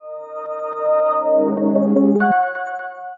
pera's Introgui reversed and remixed.